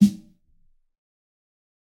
This is a realistic snare I've made mixing various sounds. This time it sounds fatter